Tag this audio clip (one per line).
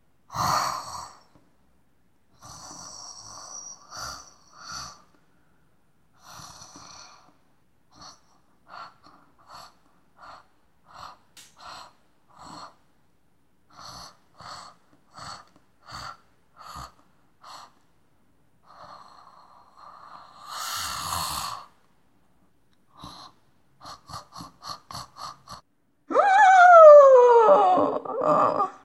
Voz
Gritos
Voice